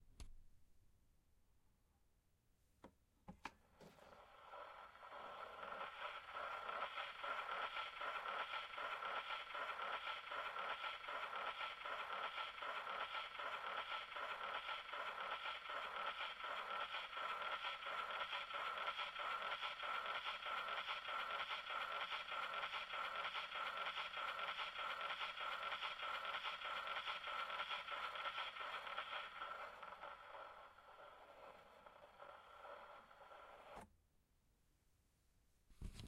End scratch
Gramophone record ending.
antique, antique-audio, ending, End-scratch, Gramophone, mechanical-instrument, Playback-rate